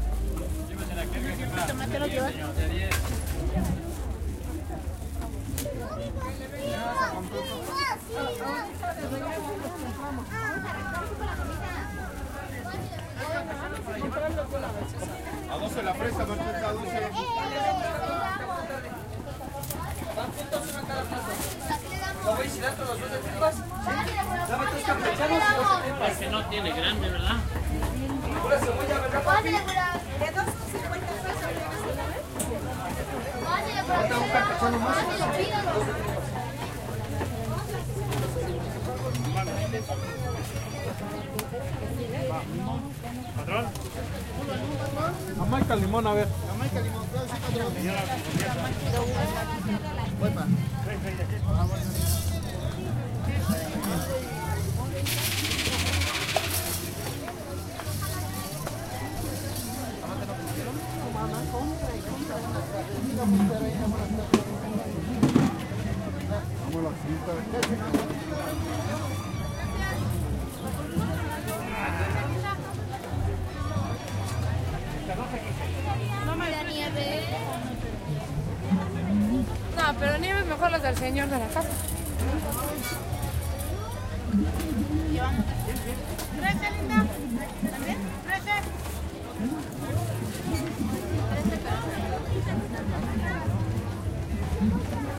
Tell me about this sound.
Tianguis (Market) Atmosphere in méxico city.
ambient, atmosphere, general-noise, market, salesman